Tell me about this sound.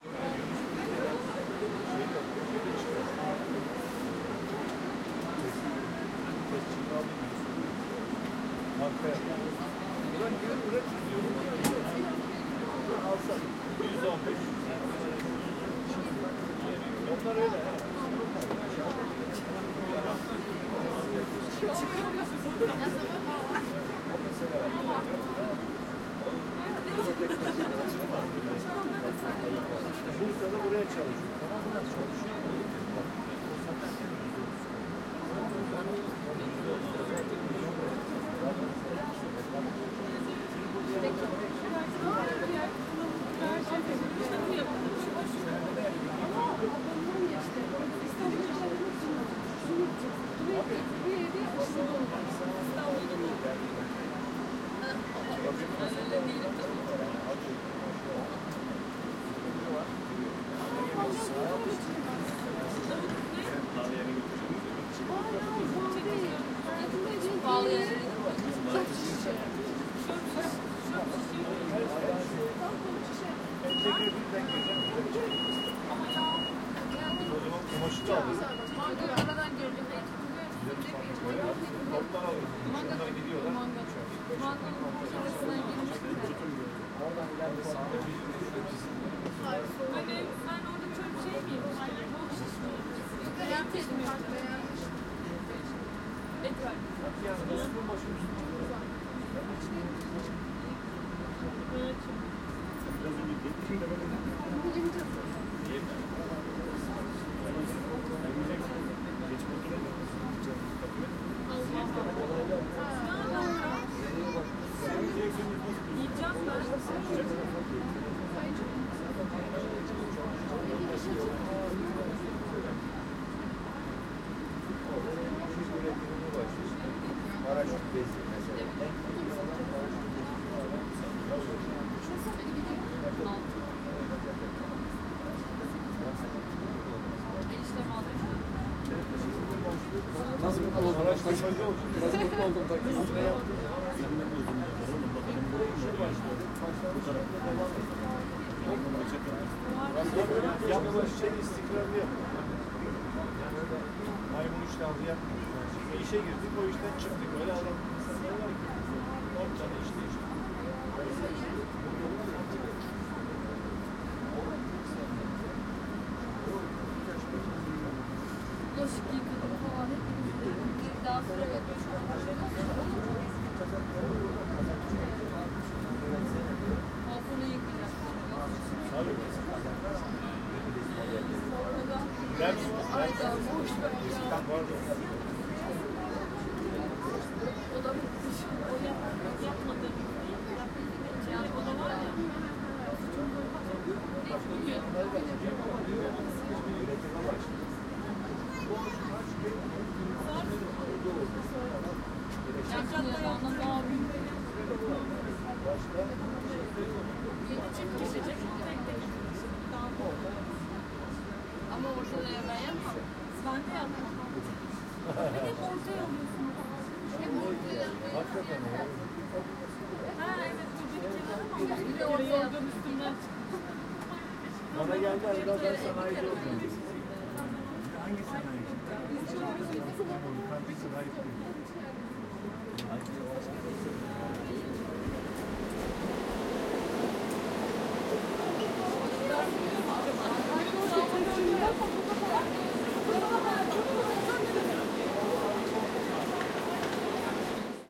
Underground funikuler ride amb inside, Istanbul Turkey
Underground funikuler ride amb recorded in Istanbul
Istanbul, train, inside, ride, city, tram, amb, Underground, metro, funikuler, Turkey